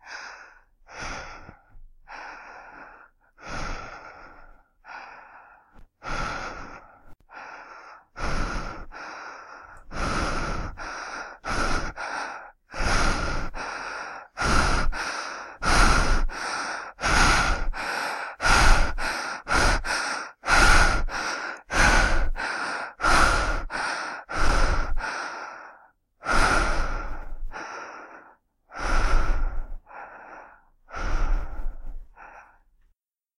A woman breathing deeply.
Recorded with an Alctron T 51 ST.
{"fr":"Respiration Féminine - 1","desc":"Une femme respirant profondément.","tags":"respiration féminine femme humain corps efforts sport"}